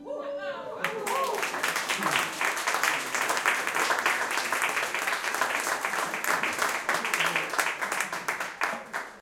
Short burst of applause. Recorded with the inside microphones of an Olympus LS-10.
applause, crowd, field-recording, people